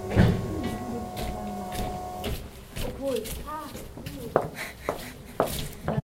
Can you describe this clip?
20141119 steps H2nextXY
Sound Description: echoing steps of heeled shoes in the hallway
Recording Device: Zoom H2next with xy-capsule
Location: Universität zu Köln, Humanwissenschaftliche Fakultät, building 906, groundfloor
Lat: 50.934730
Lon: 6.920533
Recorded by: Natalie Tran and edited by: Melanie Haselhoff
This recording was created during the seminar "Gestaltung auditiver Medien" (WS 2014/2015) Intermedia, Bachelor of Arts, University of Cologne.
cologne, shoes, field-recording, steps, university, hallway